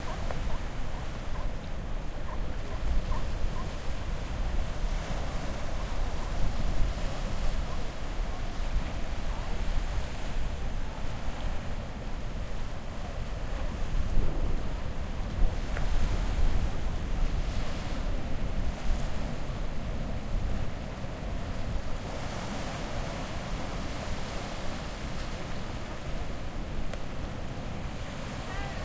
Recorded at Pebble Beach just off the 17 mile drive. Audio includes sea lions, sea gulls and the seashore.